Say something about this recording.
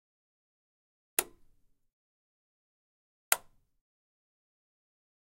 light switch 2
Switching a light off then on.
switch, foley, light